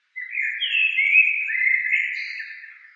These are mostly blackbirds, recorded in the backyard of my house. EQed, Denoised and Amplified.
bird, blackbird, field-recording, nature, processed